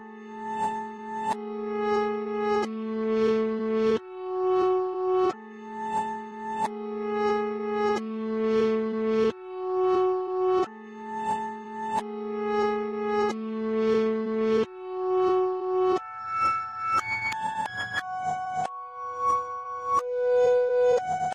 reversed free EVM grand piano
piano, melodic, reversed, relaxing, soft